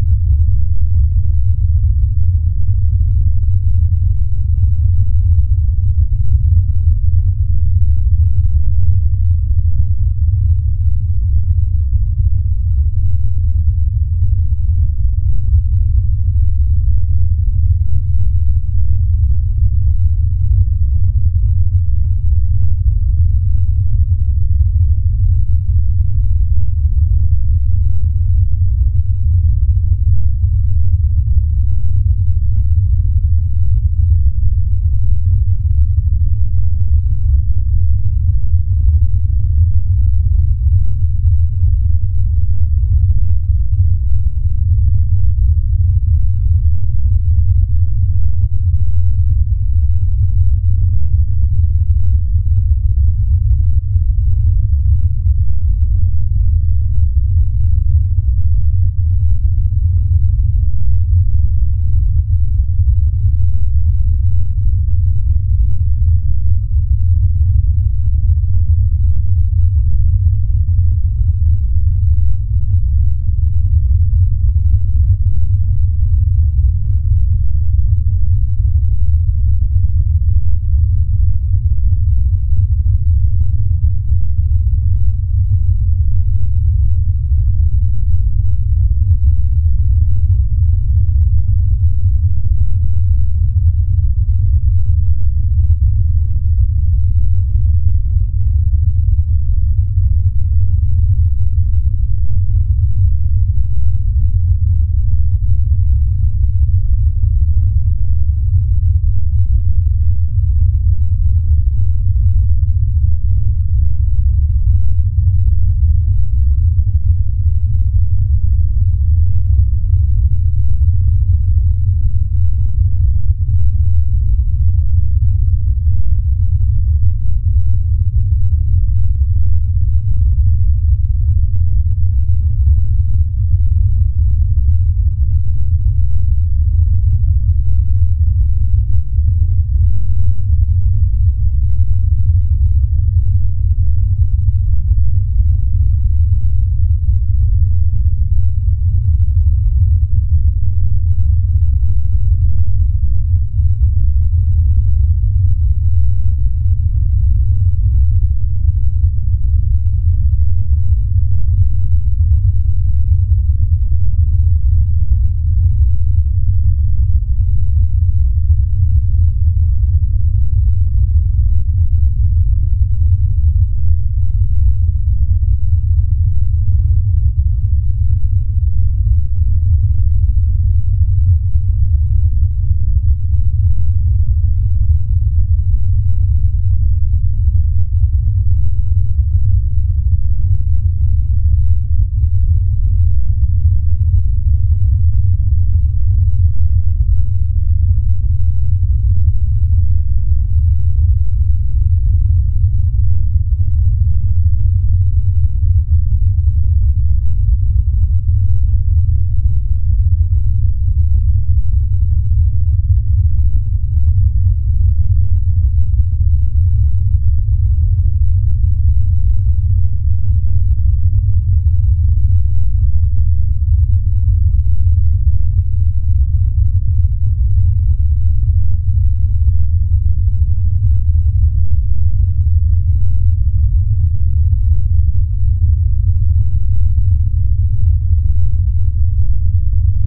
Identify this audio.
The Paranormal Activity Sound
A recreation of the famous Paranormal Activity Sound. Created by slowing down brownian noise and applying extensive equalization. The sound is loopable and matches the frequency spectrum as the one in the first movie.
Ghost, Spirit, Activity, Brownian, Suspense, Paranormal, Drone, Loop, Horror, Bass, Scary, Ambient